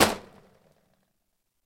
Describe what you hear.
Beercrate being moved